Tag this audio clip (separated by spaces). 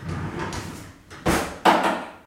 drawer opening cutlery